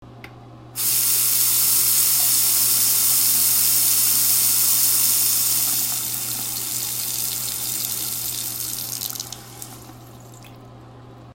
Running Sink Water
running the sink
sink, water